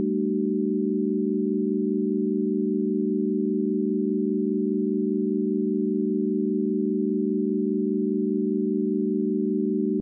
base+0o--4-chord--11--CDGB--100-100-100-100
test signal chord pythagorean ratio
chord, pythagorean, ratio, test